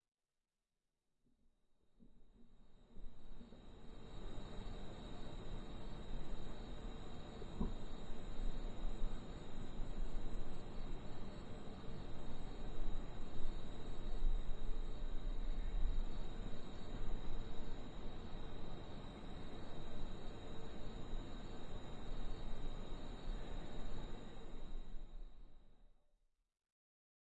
Weeds 1 Edit
Weeds recording outside